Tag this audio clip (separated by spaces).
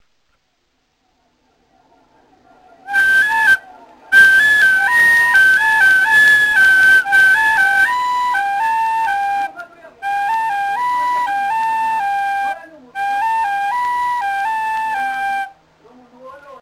whistle tin